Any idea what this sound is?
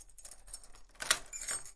Door Lock 02
House door locked. Recorded on a Zoom H4N using the internal mics.